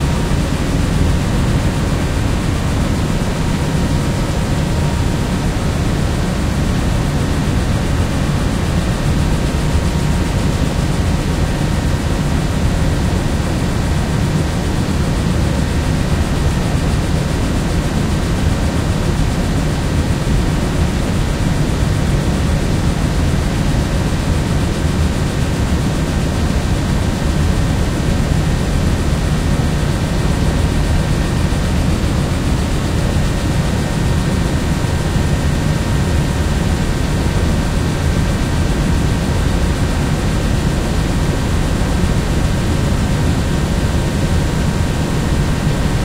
Machine,Room,Ambience,XY
Part of a series of various sounds recorded in a college building for a school project. Recorded using the built in XY microphones of a Sony PCM-m10 field recorder unit.